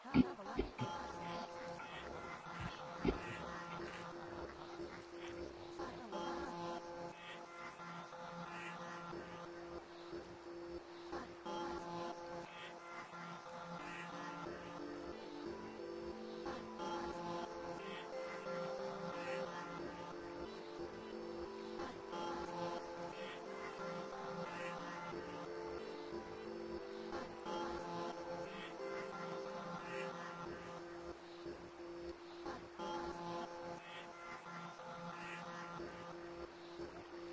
Sunny Cities and who they remind me off that I have been too in the past 5 years. Ambient Backgrounds and Processed to a T.
clip, heavily, cuts, rework, copy, processed, atmospheres, tmosphere, saturated, pads